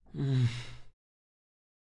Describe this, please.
Suspiro Resignacion
resignation sigh sound